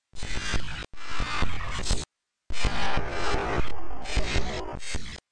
A strangling schidle.